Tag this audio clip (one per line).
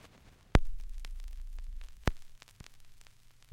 crackle
dust
hiss
noise
pop
record
static
turntable
vinyl
warm
warmth